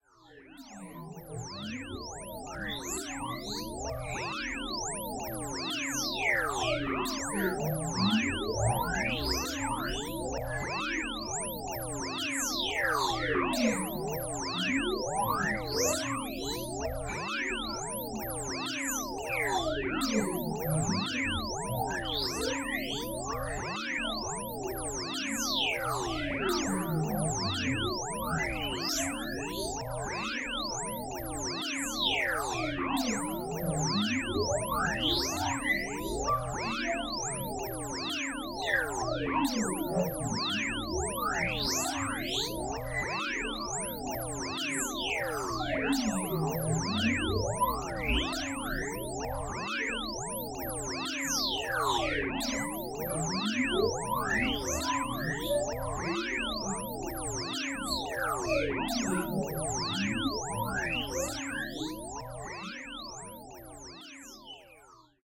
Single osc, lfo on v/oct, tons of reverb/delay provided by Chronoblobs and Clouds modules.
Initially this sounds quite nice but it gets tiring really fast!
VCV rack patch:chronoblobs_clouds